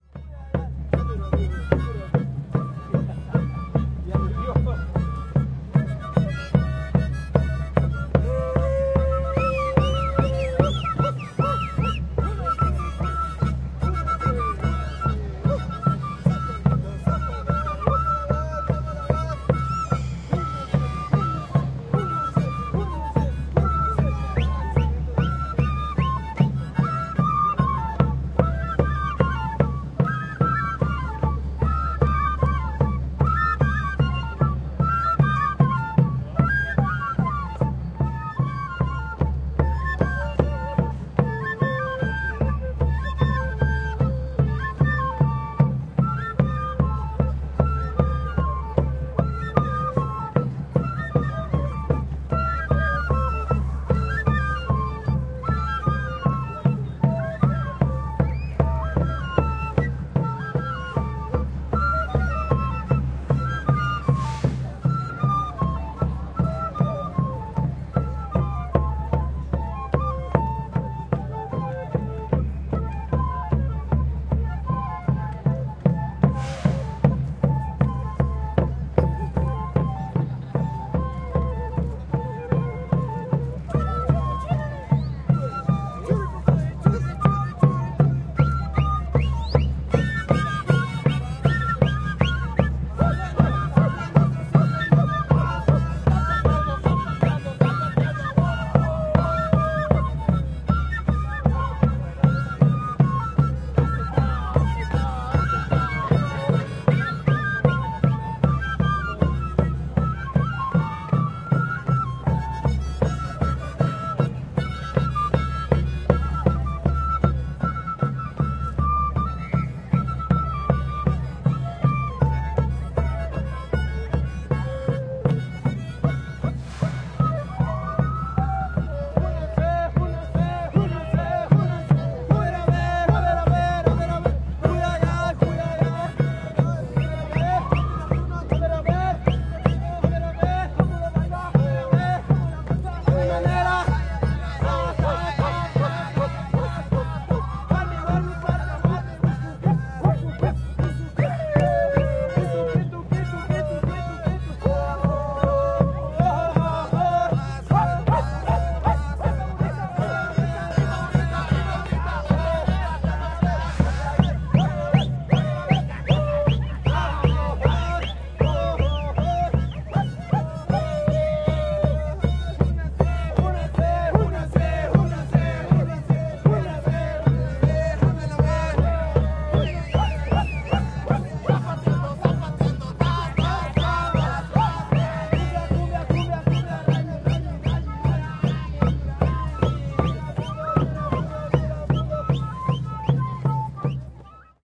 Recorded a indian ceremony on a central place in quito ecuador.